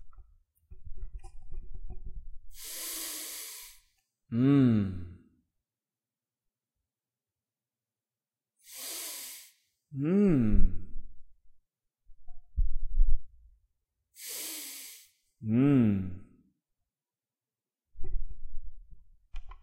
Sniff mmmm
A long sniff and then an approving mmmm vocalization.
mmm, mm, good, yum, smell, mmmm, sniff, smelly, glass, wine, tasty